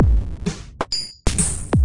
Doom Shuffle2 130
dirty, beats, experimental, industrial, harsh, glitch, distorted, percussion, drums